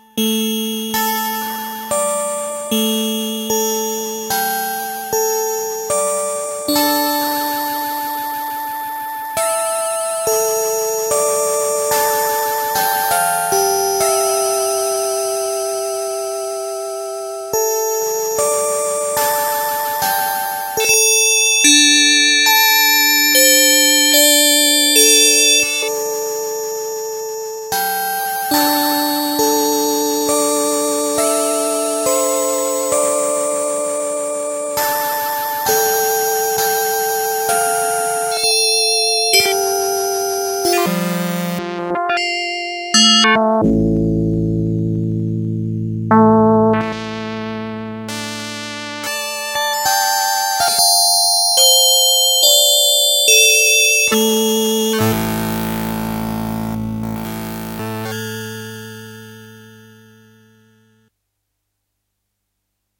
Recordings of a Yamaha PSS-370 keyboard with built-in FM-synthesizer